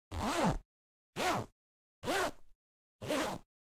Closing Laptop Case
computer, computer-bag, zip, laptop, laptop-zip